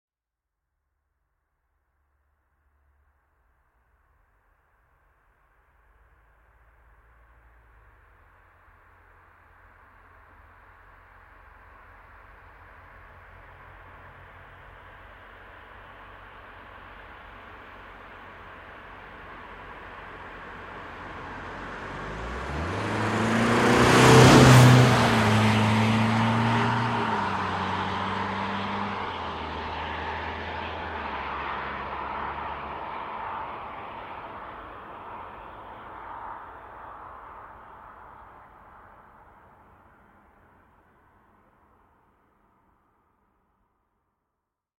BMW 420D Gran Coupe exterior passby 50kmph and acceleration mono NTG3
This ambient sound effect was recorded with high quality sound equipment and comes from a sound library called BMW 420D Gran Coupe which is pack of 72 high quality audio files with a total length of 166 minutes. In this library you'll find various engine sounds recorded onboard and from exterior perspectives, along with foley and other sound effects.
passes, gran, car, drive, motive, slow, engine, whoosh, sound, diesel, perspective, acceleration, bmw, passby, driving, vehicle, exterior, automobile, 420d, external, auto, coupe, pass, effect